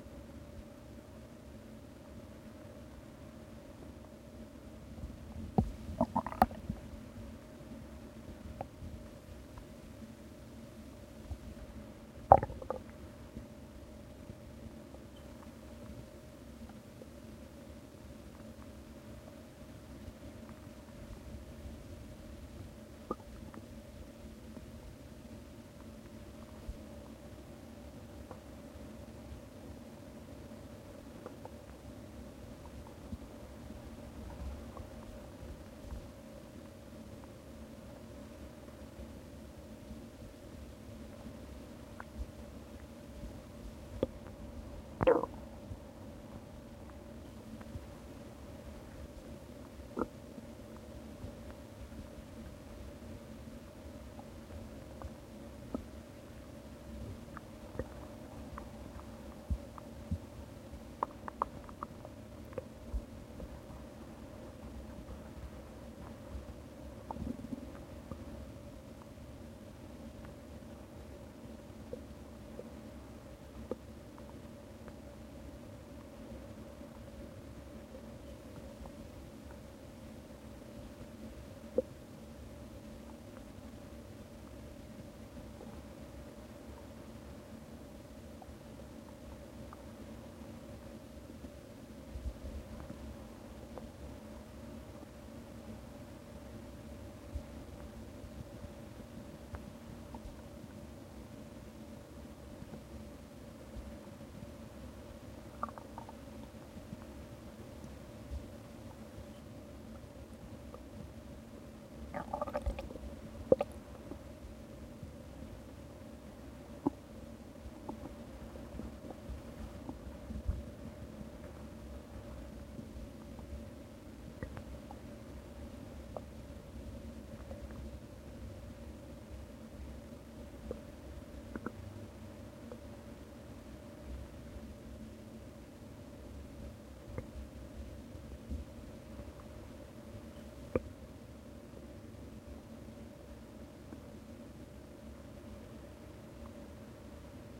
Quiet bubbling and groaning on an empty stomach.
groan, bubble, guts, stomach, human